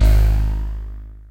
02 Eqx Beezlfs G#1
Mapped multisample patch created with synthesizer Equinox.
multi, sample, synth